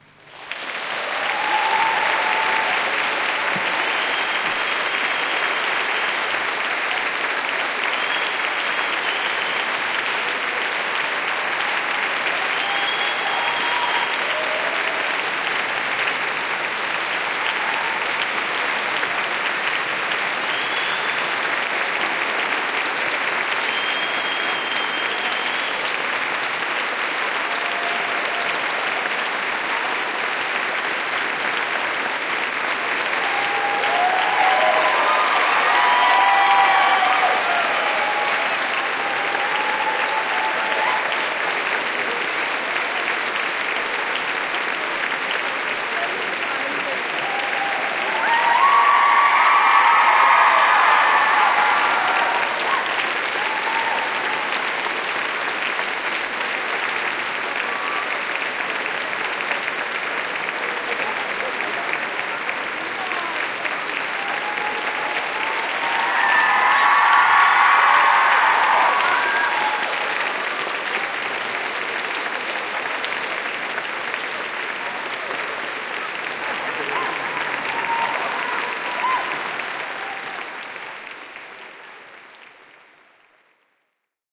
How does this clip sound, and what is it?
SCC CLAPTER 20101209
More applause from a children's choir concert.
adulation, applause, audience, celebration, clapping, clapter, crowd, praise, reaction, yelling